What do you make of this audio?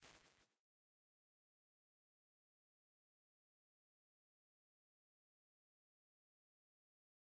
QV Hall dec10 diff5
Quadraverb IRs, captured from a hardware reverb from 1989.
convolution, FX, impulse-response, IR